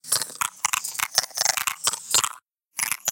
processed glass sound
glass, processed, sound-design